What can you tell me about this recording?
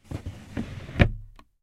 Wooden Drawer CLOSE
Sound of a wooden drawer closing recorded from the inside of a walk-in robe so the sound is close and damped.
drawer-close, wooden-drawer